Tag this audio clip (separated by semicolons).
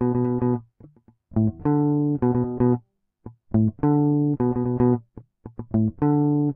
acid; apstract; funk; fusion; groovie; guitar; jazz; jazzy; licks; lines; pattern